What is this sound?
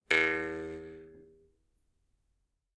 Jew's Harp, Single, A (H6 XY)
Raw audio of a metal jew's harp being played with the vowel 'A/E' with no vibrato or breathing. Recorded simultaneously with the Zoom H1, Zoom H4n Pro and Zoom H6 to compare quality.
An example of how you might credit is by putting this in the description/credits:
The sound was recorded using a "H6 (XY Capsule) Zoom recorder" on 11th November 2017.
boing, cartoon, H6, harp, jew, jew-harp, jews, s, single, twang